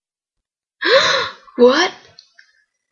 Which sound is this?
woman being scared
woman, scary, surprised